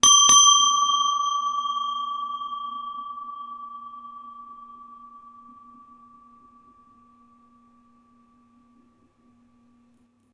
Meditation bell, sped up, with two hits.
bell, double, pitch-shift